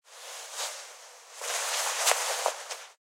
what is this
Rustling fabric
cloth, clothes, clothing, coat, fabric, jacket, movement, moving, pants, pillow, rubbing, rustle, rustling, shirt, undress